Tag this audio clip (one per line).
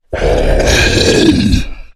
arcade
brute
deep
fantasy
game
gamedev
gamedeveloping
games
gaming
indiedev
indiegamedev
low-pitch
male
monster
Orc
RPG
sfx
Speak
Talk
troll
videogame
videogames
vocal
voice
Voices